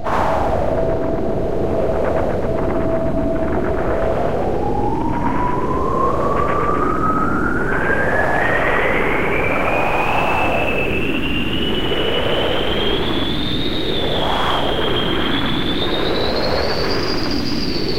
Software synthesized wind mixed with cellphone recorded wind and processed some more...
contest, wind